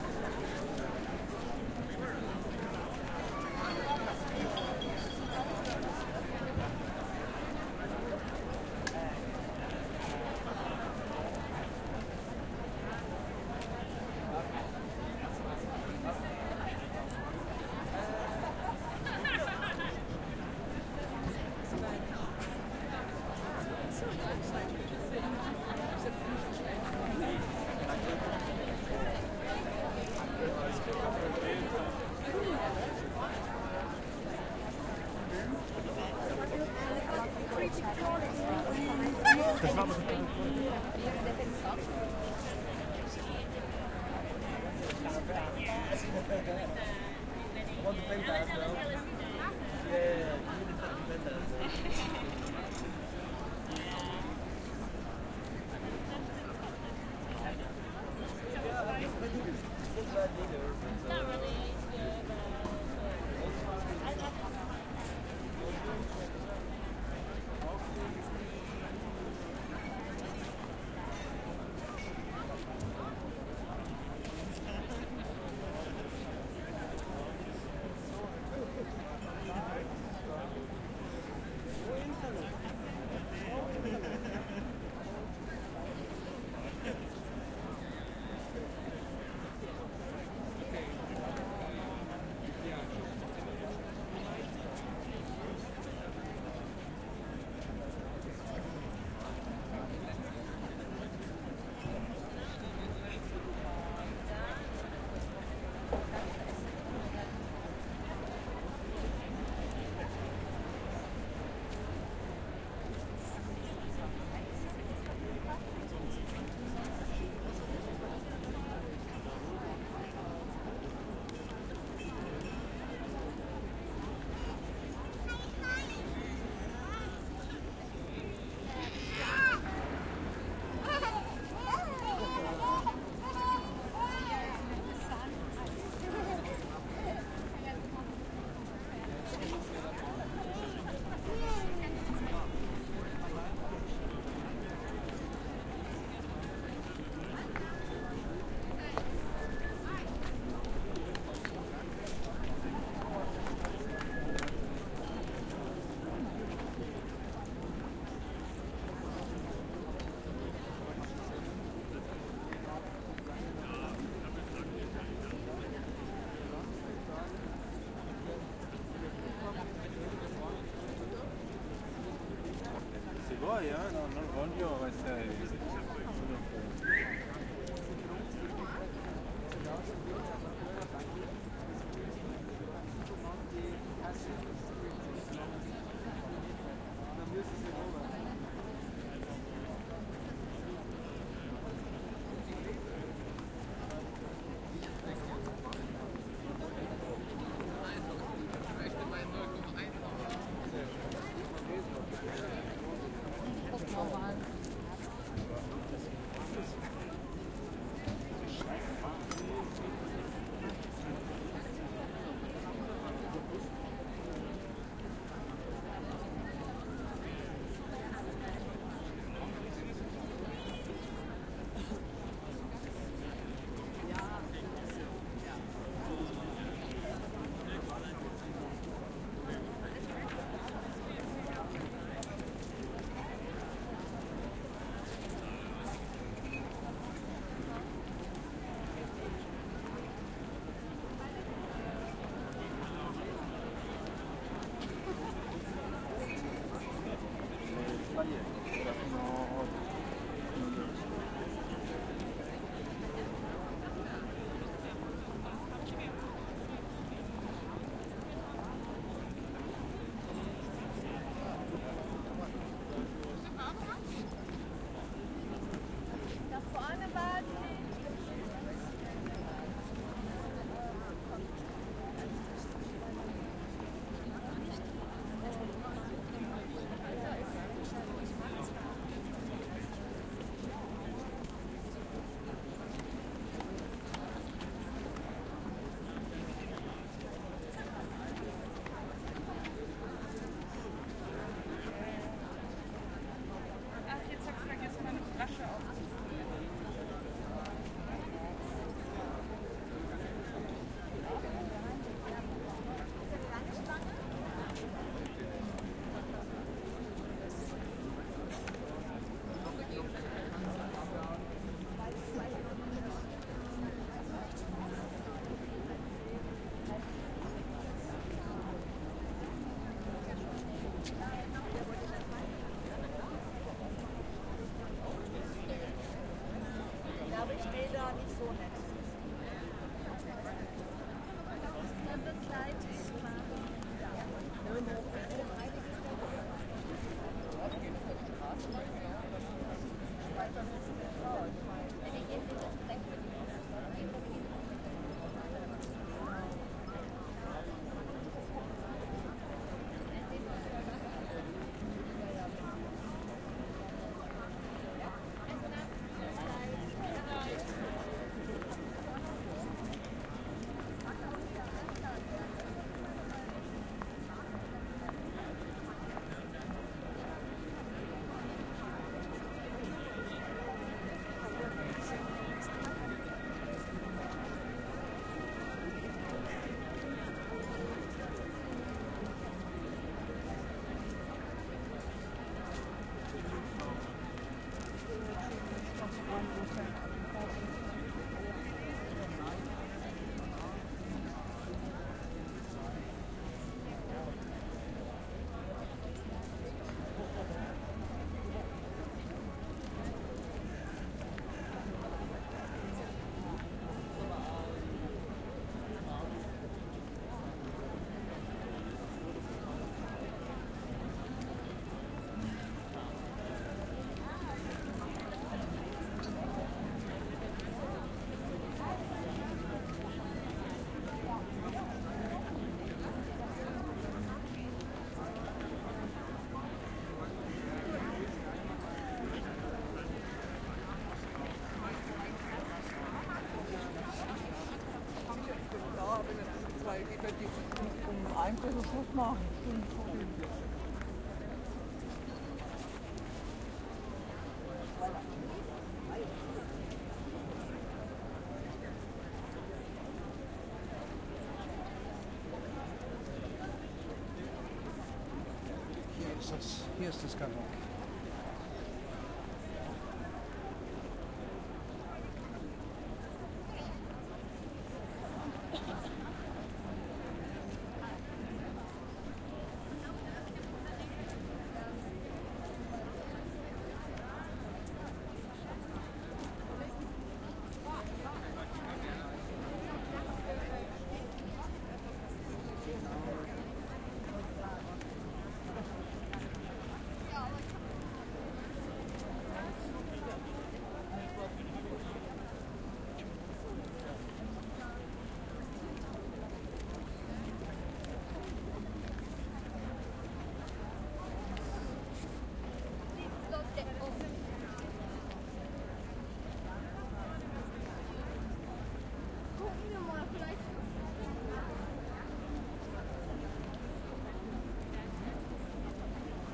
AMBLM ext day people crowd walla berlin kulturforum international german english summer
Stereo ambience of people passing by at the Kulturforum in Berlin. This is a large open concrete space next to the Berlin Philharmonic Hall.
It's a warm summer evening and the Berlin Philharmonics have just finished an open air concert. The recording starts about 15 minutes after the end of the concert while everyone is leaving the place, maybe about 1000-1500 people.
The crowd is generally in a happy and talkative mood. There are lots of international folks and tourists, so you'll not only hear German, but also English and lots of other languages.
After six minutes or so there is also a police siren in the background.
Recorded with a Zoom H6 (XY mics).
In the same pack there is also a second recording starting directly at the end of the concert with people applauding. It is can be found here
ambience, berlin, chatting, crowd, english, field-recording, tourists, walla